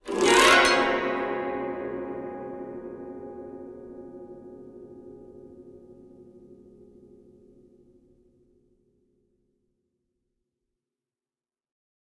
Fast Ferventia Barrel Piano Glissando
A quick glissando (sweep) on an antique Ferventia Barrel Piano that is out of tune.